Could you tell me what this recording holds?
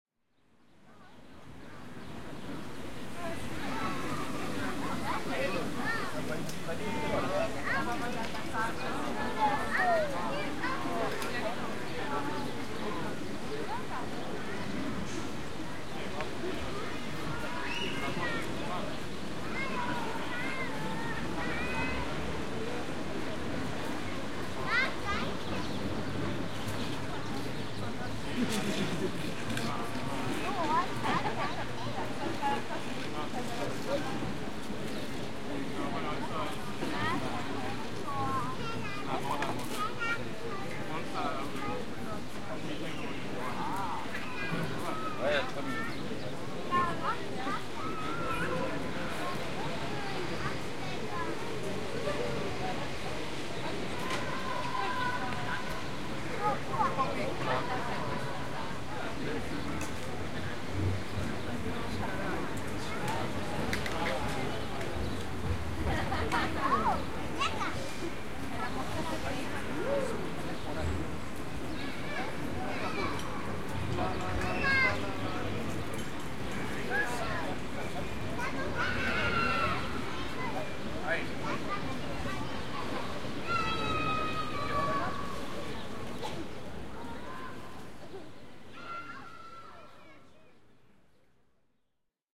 City Playground (Boxhagenerplatz, Berlin)
children, school-yard, germany, spielplatz